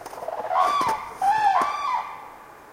I heard and saw cranes at Målsjön in Kristdala,Sweden,it`s a bird-lake.
I did some recordings in 2nd of april.
microphones two CM3 from Line Audio
And windshields from rycote.